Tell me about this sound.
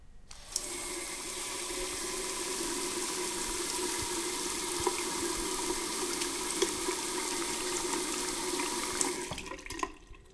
Water running out of the water tap.